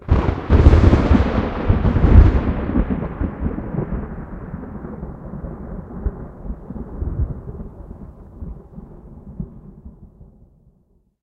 A loud rumbling thunder clap, edited in audacity by amplifying the sound
thunder, weather